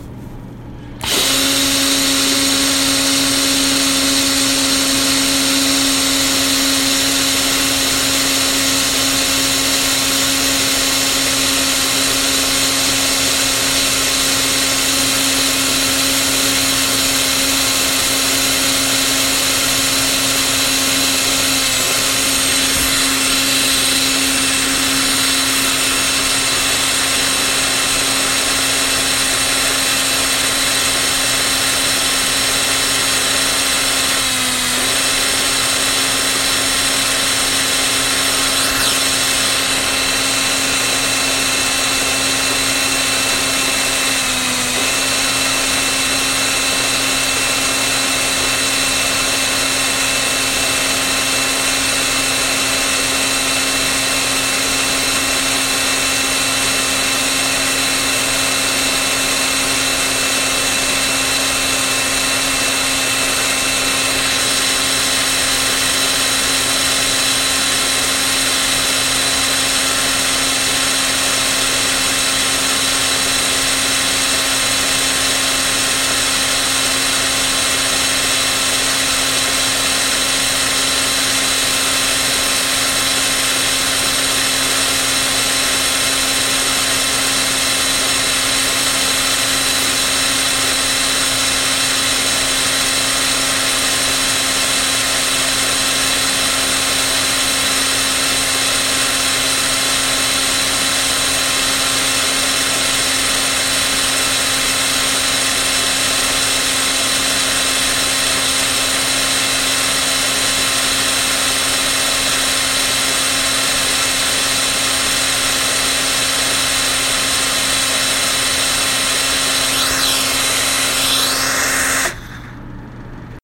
Sound of a screw driver, including start and stop